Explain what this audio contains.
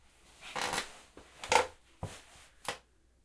Office Chair - Sitting Down 2
Sitting down in a creaky/squeaky office chair.